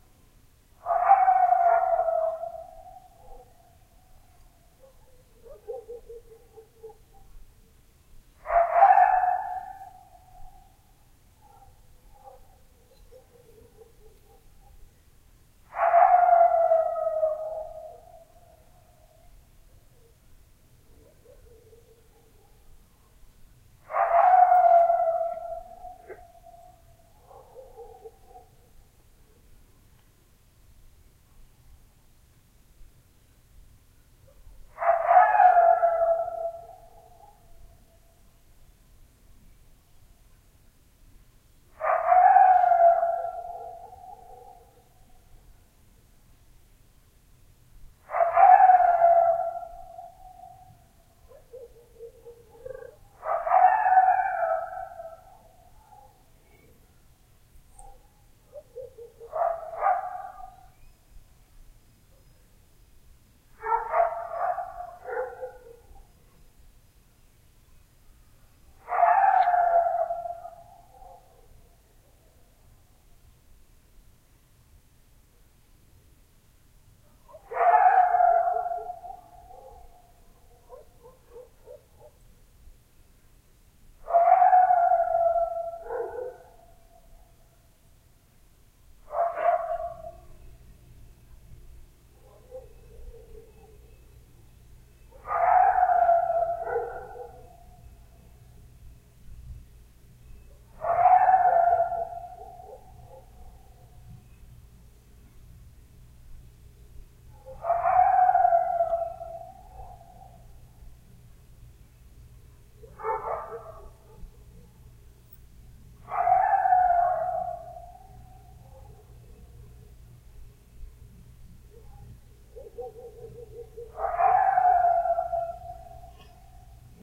Coyote from the window 2 ampl
Early one cold December morning, this coyote woke me. This recording is the second taken, and was recorded from indoors, listening out the window. Amplified and edited for noise in Audacity.